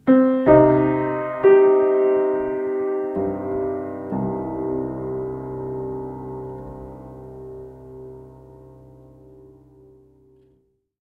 Short Piano Intro 4
Short simple Piano intro for various use in movies and games. You can find more like these in my pack "Piano Intros"
;) Thank you!
Tascam, Keys, Field-recorder, Beautiful, film, cinematic, movie, Ambiance, Background, recording, game, audacity, Piano, Short-film, music, dramatic, Tascam-DR40